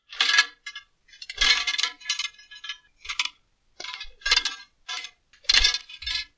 Medieval Sword Equipment
A metalic weapon sound resembling a sword that is being carried around. Created from recording a head scratcher and pitch shifting it down.
Equipment, Medieval, Metal, Metallic, Sword, Weapon